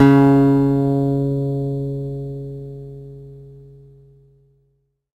Sampling of my electro acoustic guitar Sherwood SH887 three octaves and five velocity levels
acoustic, guitar, multisample